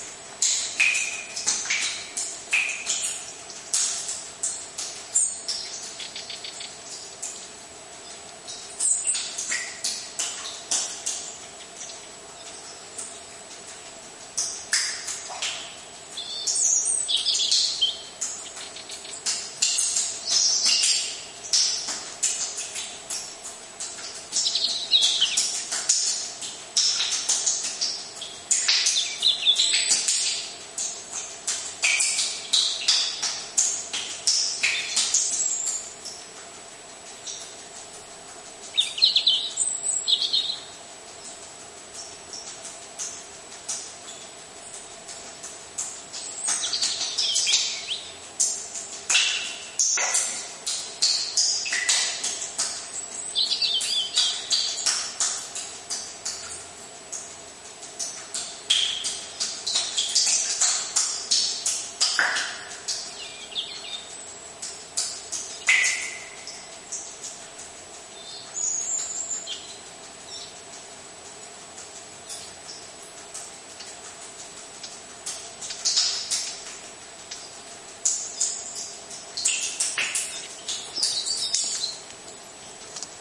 Fuente Robin.
This is a phone recording of water trickling into our spring fed, underground water deposit in the Spring, with a Robin's song punctuating the rhythm.
recording, water, birdsong, ambience, birds, soundscape, atmosphere, Robin, ambient, Field, trickling, field-recording, ambiance, nature